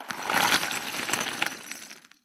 Forge - Adding coal short
Coal being added to the forge using a shovel, short.
work, metalwork, blacksmith, 3beat, one-shot, tools, crafts, coal, labor, furnace, field-recording, forge, 80bpm, fireplace